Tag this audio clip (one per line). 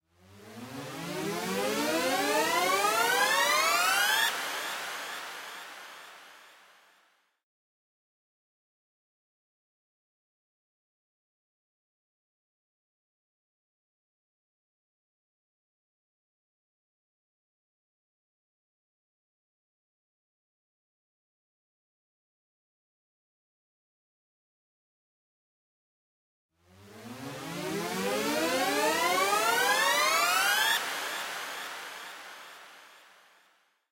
up
approach
high
rising
rise
through
sweep
higher
rize